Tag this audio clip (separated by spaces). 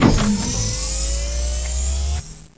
door open portal ship space